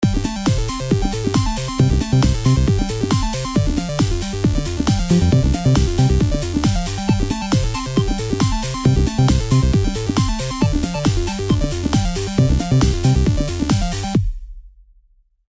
Funny little colored teddy bears dancing over the rainbow at the dance floor of electronic madness.
fun, electronic, loop, music, electro, funny, synth